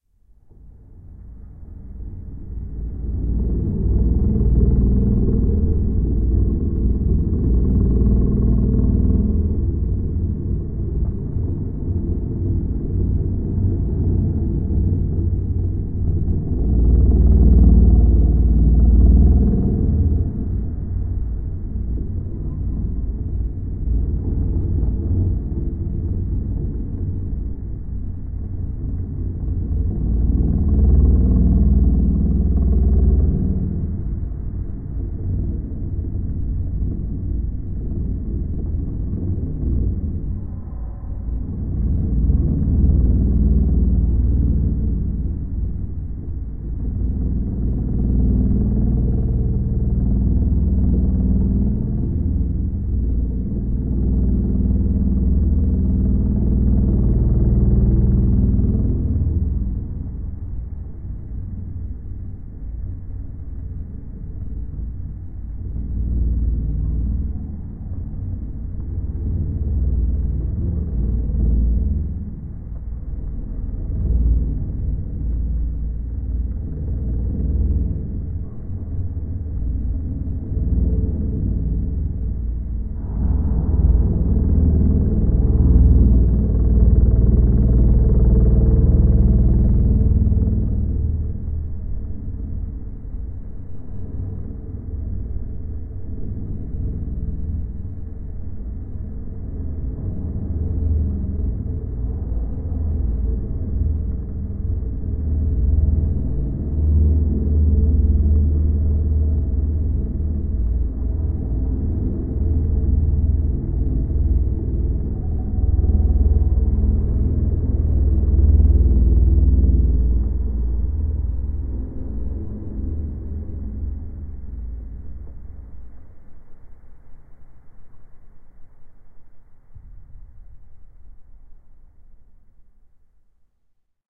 A violin pitched down and effected.
violin, drone, tense, suspense